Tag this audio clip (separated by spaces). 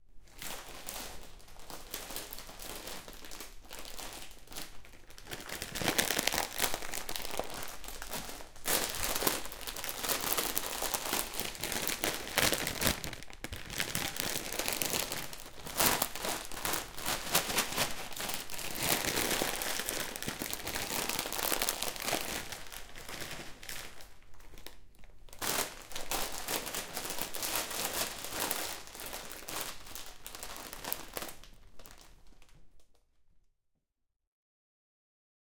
Head-related Fx Domestic-sounds Binaural